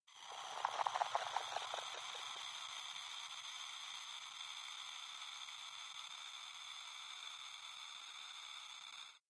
STM3 growl loop wet
Extremely quiet version of growl_loop. No bass. Telephone like.